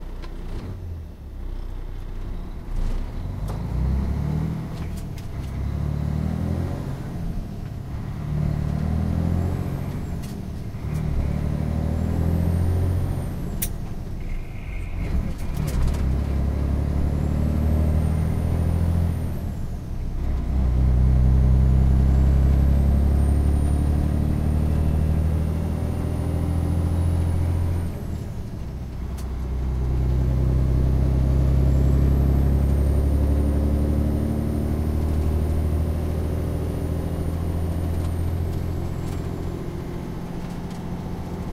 A tractor trailer shifting gears from inside.